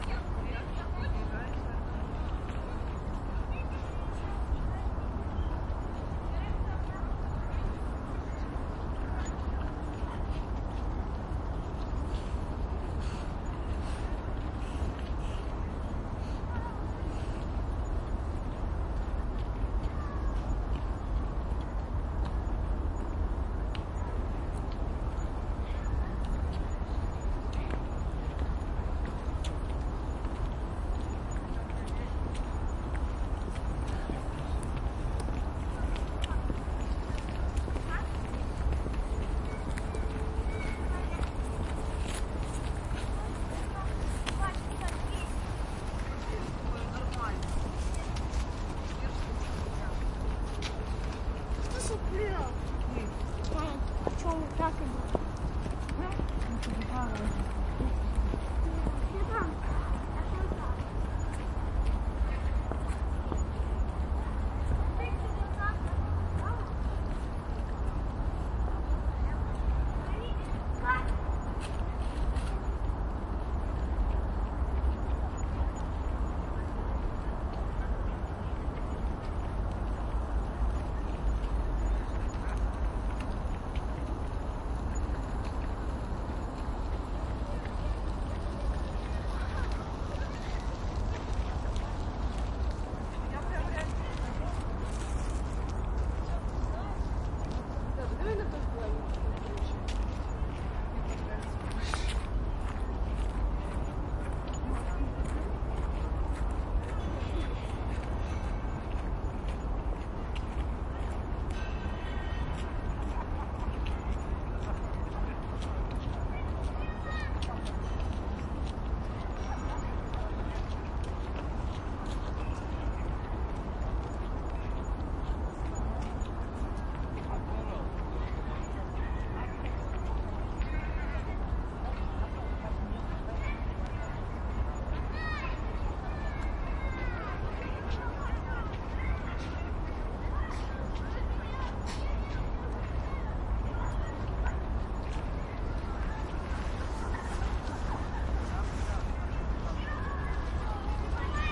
winter lake with some crowd and distant traffic
Winter lake at the evening with light crowd walla. Some background traffic and footsteps. Russian voices.
Recorded with pair of DPA4060 and SD MixPre-D in pseudo-binaural array
wind, crowd